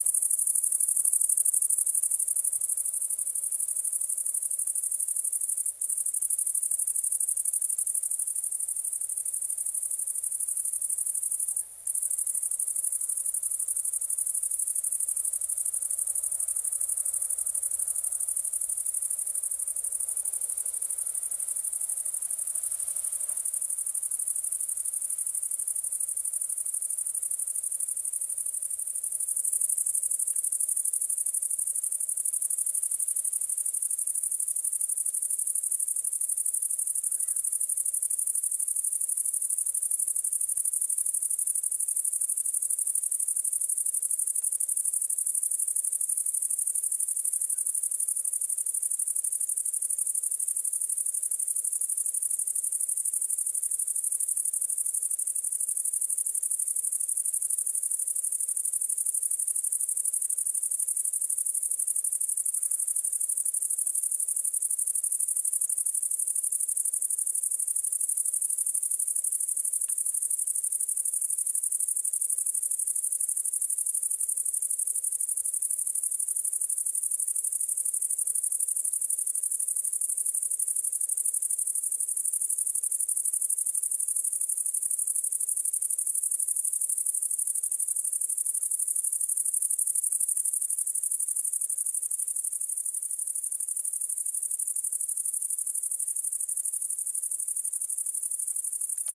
Grasshopper "singing" or chirping on an open field north of Cologne, Germany. Typical summer sound. Vivanco EM35 on parabolic dish with preamp into Marantz PMD 671. Background traffic humming filtered.